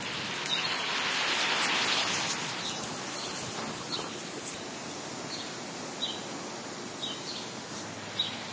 NYC birds 7.14.2013
Bird sounds in NYC. Recorded with iPhone 4S internal mic.
bird-calls, birds, nature, nature-sounds, NYC, NYC-nature, parks